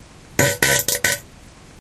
segmented toilet fart
fart poot gas flatulence flatulation
fart, flatulation, flatulence, gas, poot